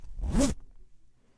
Opening and closing a zipper in different ways.
Recorded with an AKG C414 condenser microphone.
zipper, backpack, clothing, uam, 3naudio17